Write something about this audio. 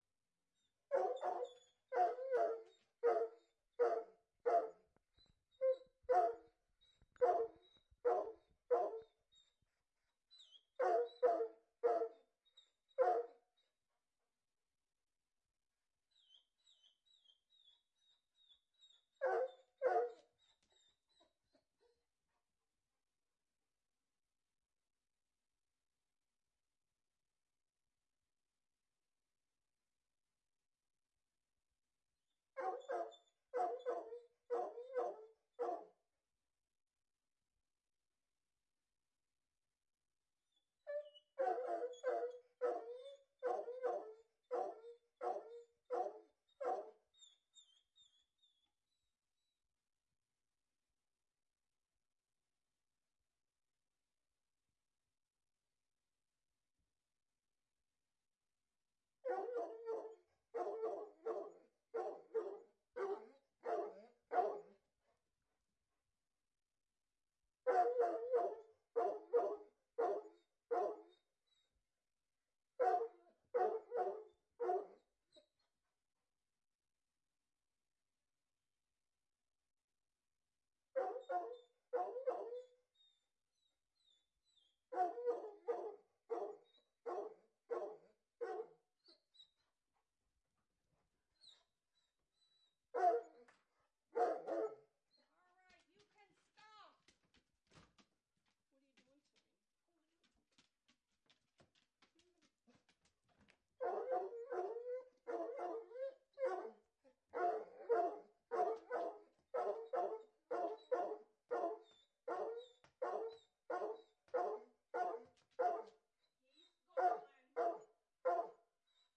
Bark Bark Bark Bark Bark